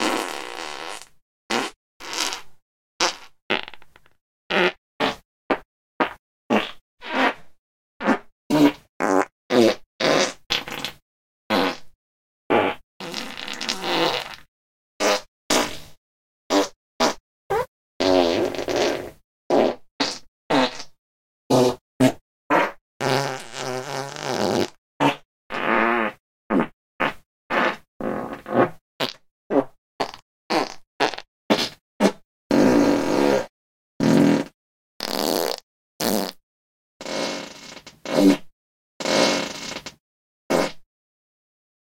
High quality studio Fart sample. From the Ultimate Fart Series. Check out the comination samples.